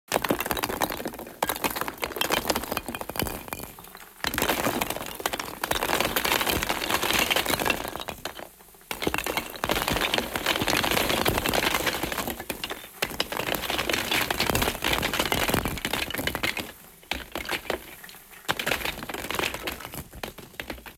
styrofoam flakes (from packaging) are trickling into a cardboard box. Recorded from within the box with stereo microphones.